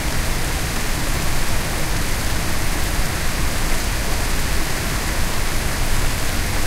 steady rain
6.5 seconds of steady, moderate rainfall. Loops seamlessly.
field-recording, nature, rain, shower, rainfall, weather